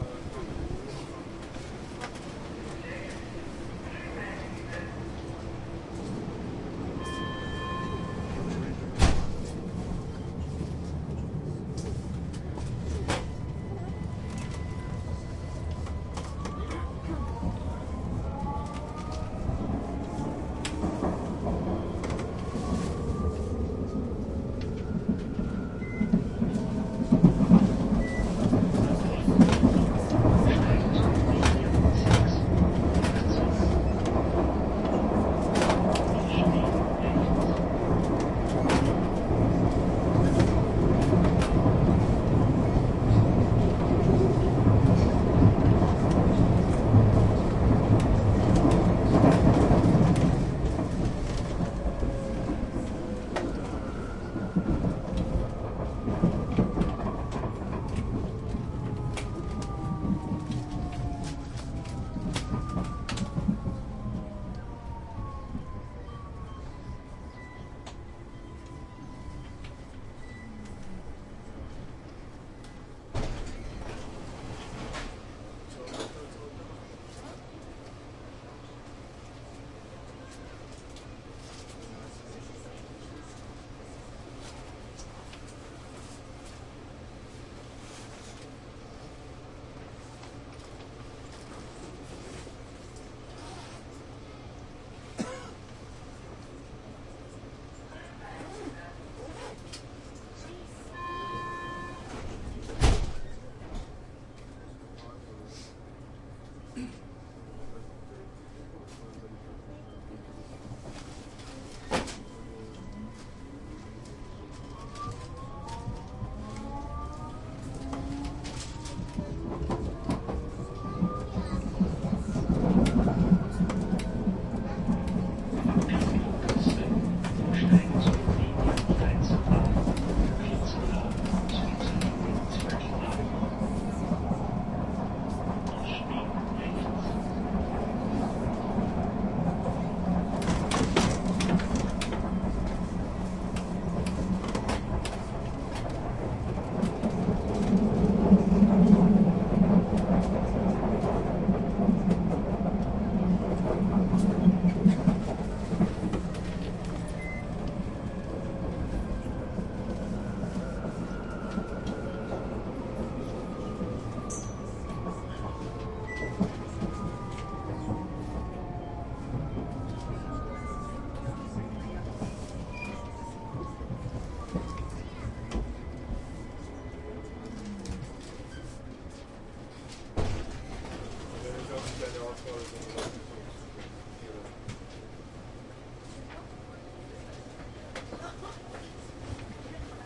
Karlsplatz 1b in U4
Recording from "Karlsplatz" in vienna.